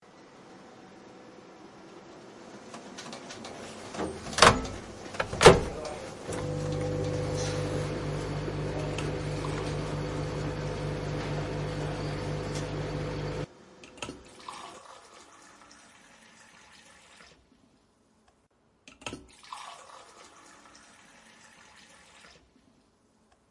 tcr soundscape hcfr cléa-marie
France, Pac, Soundscapes